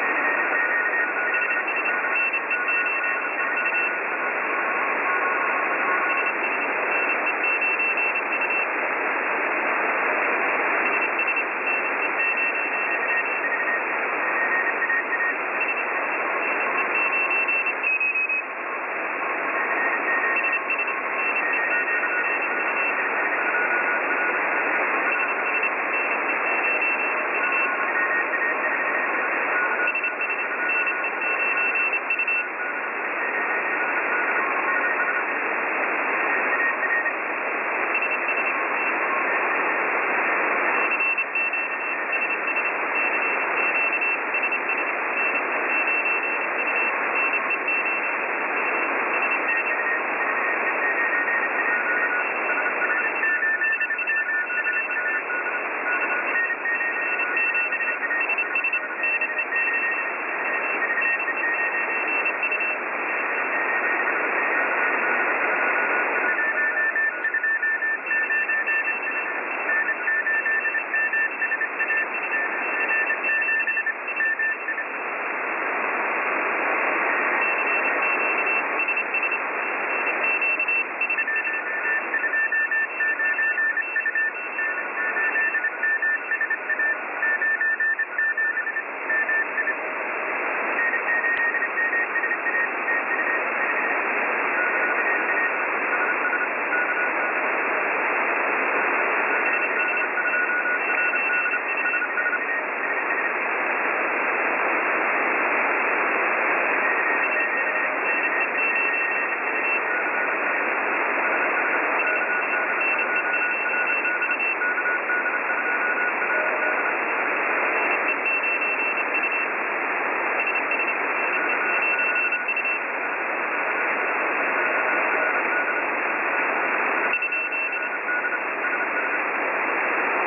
Ham radio morse code broadcasts on shortwave radio. The file name tells you the band I recorded it in. Picked up and recorded with Twente university's online radio receiver.